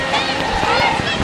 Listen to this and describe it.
newjersey OC wonderkid monoloop
Loopable snippets of boardwalk and various other Ocean City noises.
field-recording, ocean-city, loop, monophonic